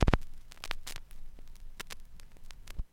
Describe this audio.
The sound of a stylus hitting the surface of a record, and then fitting into the groove.
noise, record